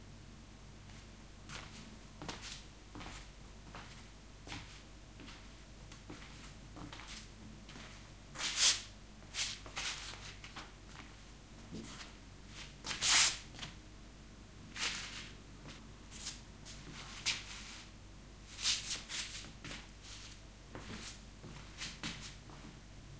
Walking on a tiled floor, lots of footscuffling.